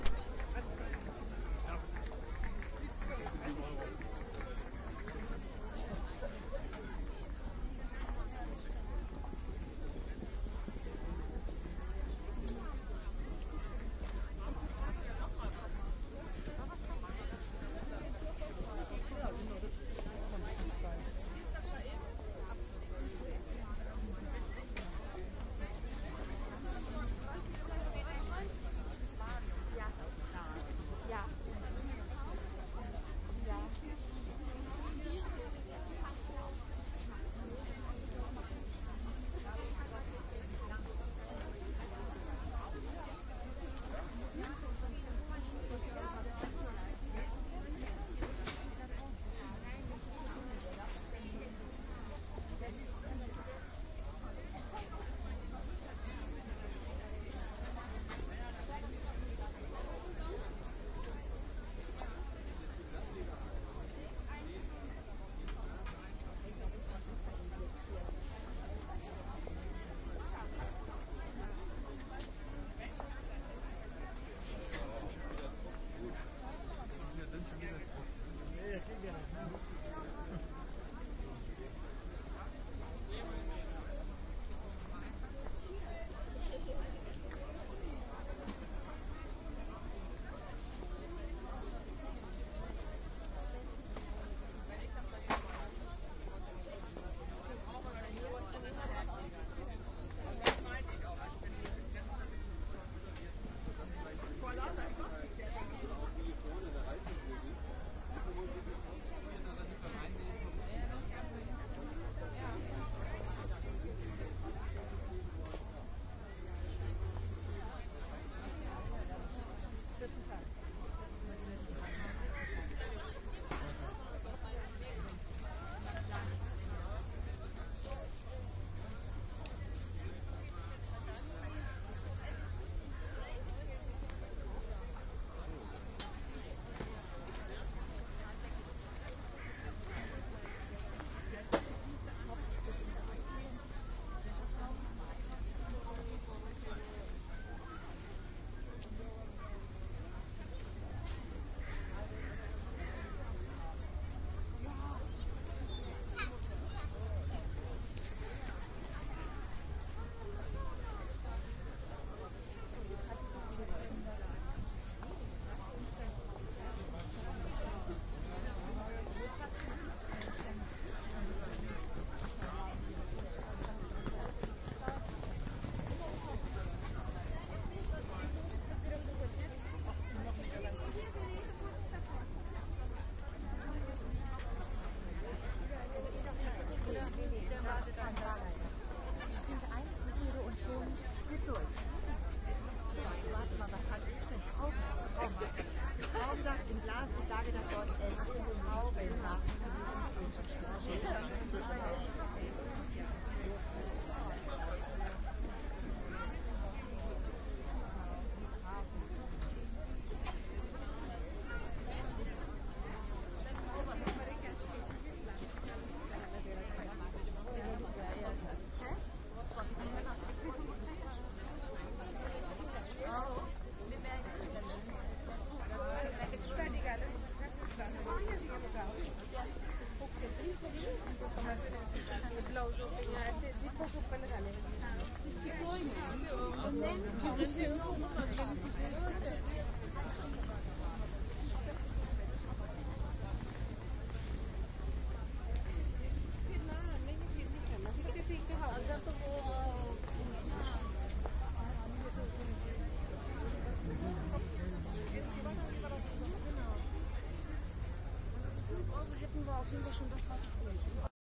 Twice a year the dutch "Stoffenspektakel"
hits Germany with a big variety of material to buy. Very nice crowd
sound, very binaural and mostly women talk about what to buy next : ) .
Soundman OKMs and Sharp Minidisk MD-DR 470H.
stoffenspektakel, crowd, market, stoffmarket, binaural